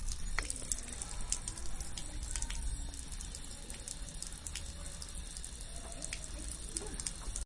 SonicSnaps HD Laura&Amy RunningWater
This is a sonic snap of running water recorded by Laura and Amy at Humphry Davy School Penzance
cityrings, humphry-davy, sonicsnap